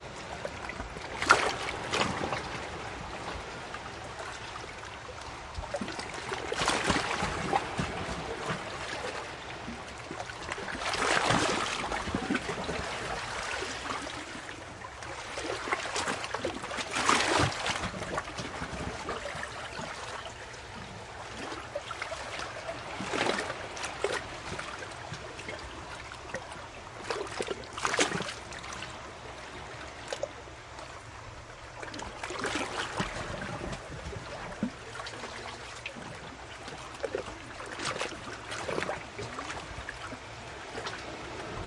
BGSaSc Greece Close Waves Water Splash Gurgle Beach 05
Close Waves Water Splash Gurgle Beach Greece 05
Recorded with Km 84 XY to Zoom H6
Ambience, Atmosphere, Background, Beach, Close, Greece, Gurgle, Lake, Nature, Splash, Summer, Water, Waves